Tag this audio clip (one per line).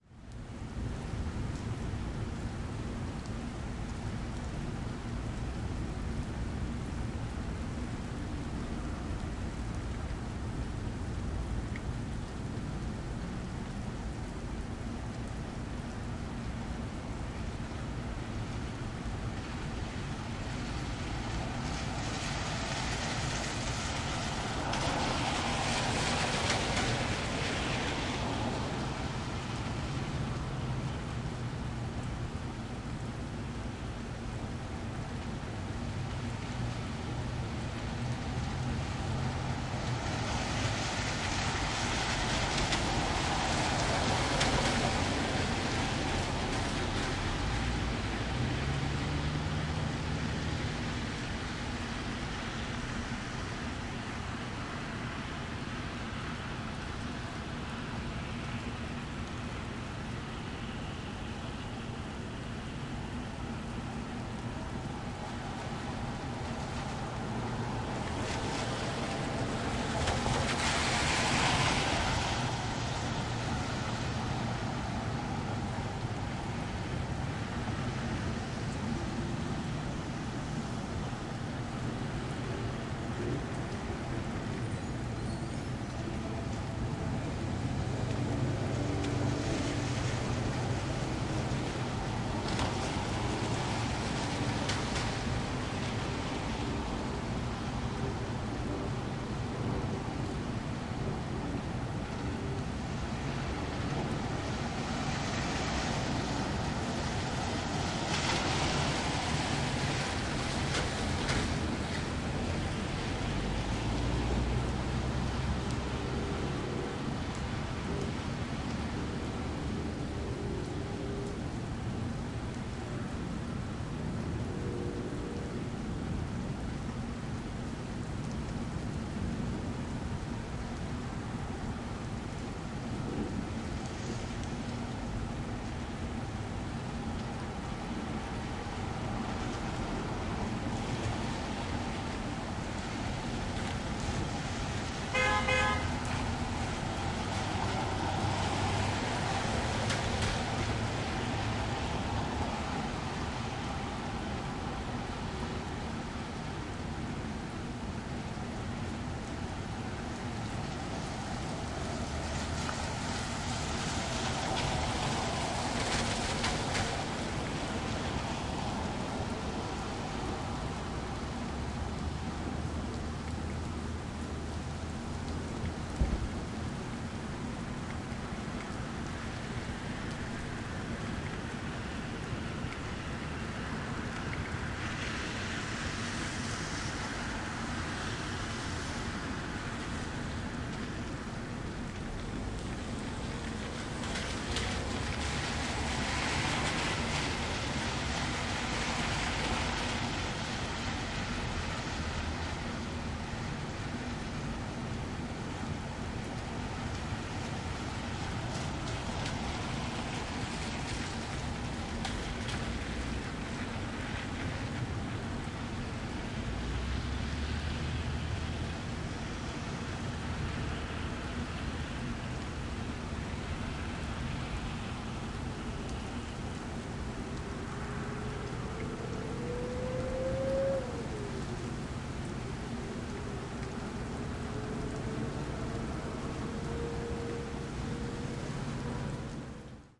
car
cars
drizzle
plane
planes
puddles
rain
suburbia
suburbs
train
trains
truck
trucks
vehicles
weather
wet